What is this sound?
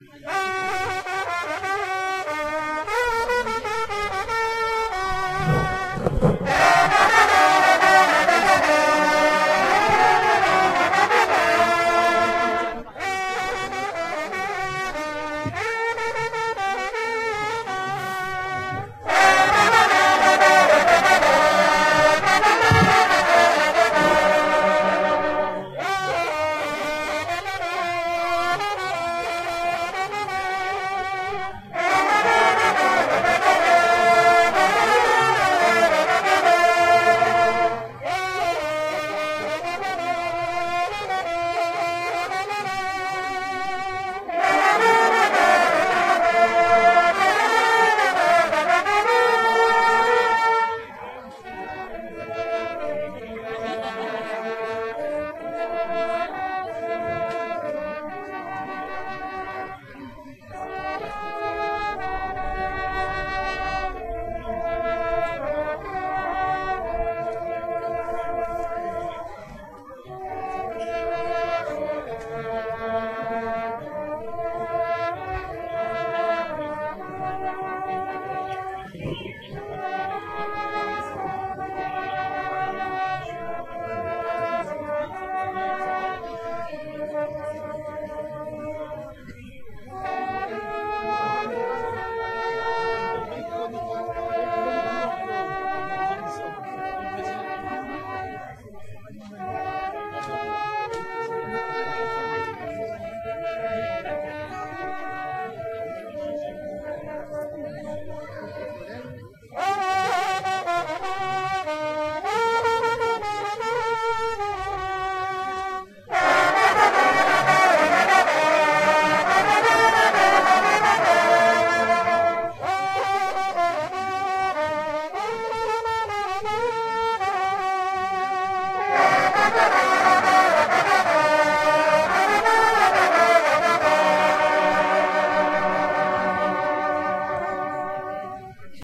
hunting horn players team registered at a hunting horn contest in Montgivray (France)
traditions, horn, hunting, france